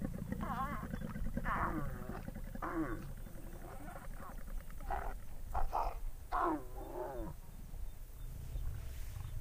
Lion-accoupl
2 lions having sex
africa, nature, wild, lions, serengeti